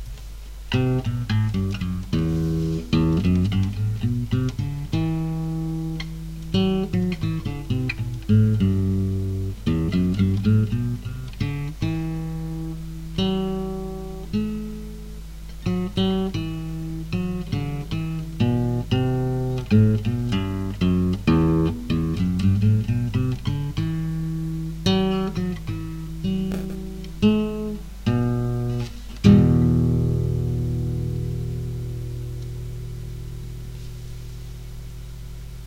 Yamaha C-40 nylon guitar recording.
acoustic, nylon, classical, guitar